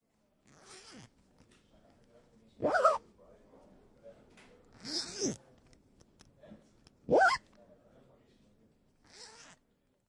It describes the sound given by a bag being closed. It can be easely heared that particular deep sound while the higher one does it as well. This record has been taken at UPF, taller's building in the computer classrooms.
UPF-CS13, case, bag, zip, close, campus-upf, open